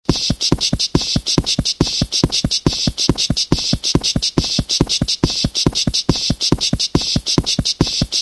Hiss Beat
Some beatbox beat I made in looper. I like the way that the hiss layer work together with the beat layer. Hope you enjoy!
Made in a samsung cell phone (S3 mini), using looper app, my voice and body noises.
base, beat, beatbox, beats, cool, dance, drum-loop, groovy, hip, hip-hop, hiphop, hiss, hop, improvised, indie, lo-fi, loop, music, rhythm, song